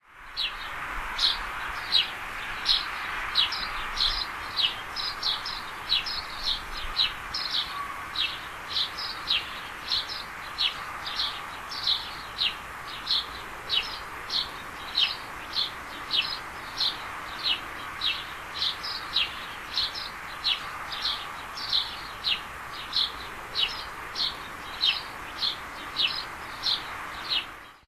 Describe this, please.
25.04.2010: about 16.00. Some birds are sing. the recording made at the back of allotments located on Legi Debinskie in the city of Poznan. There was a sunny day with a strong cold wind.
birds, bird-song, city, field-recorging, poland, poznan, spring